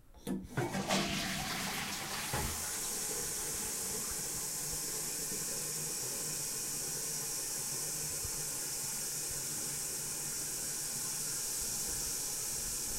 This sound is part of the sound creation that has to be done in the subject Sound Creation Lab in Pompeu Fabra university. It consists on the sound of the WC tank.
bathroom, toilet, water, wc, UPF-CS14